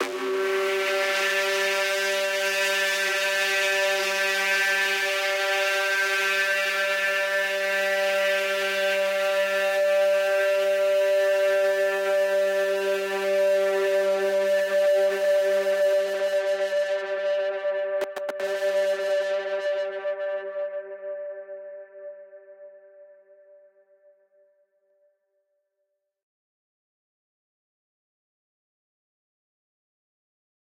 Distorted Elemnts 02
various recordings and soundfiles -> distorted -> ableton corpus -> amp
corpus, distorted